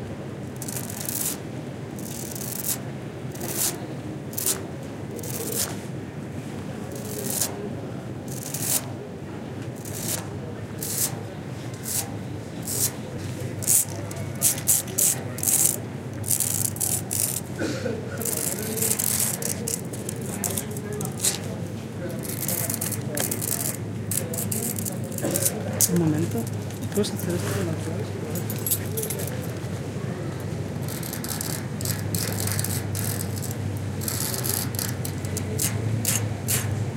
20091217.14.metal.scratching
soft scratching (finger nails and keys) on a big metal sculpture. Recorded in Seville (Plaza Nueva) during the filming of the documentary 'El caracol y el laberinto' (The Snail and the labyrinth), directed by Wilson Osorio for Minimal Films. Shure WL183 capsules, Fel preamp, Olympus LS10 recorder.
ambiance, city, field-recording, metal, seville